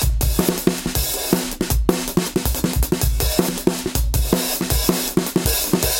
dnb drumloop c 4bars 160bpm
DnB acoustic drumloop, 4 bars at 160bpm.
Originally played/recorded in 140bpm with one mic only (Rode NT1A)
Processed to give it a trashy and agressive vibe.
drumgroove, drum-loop, n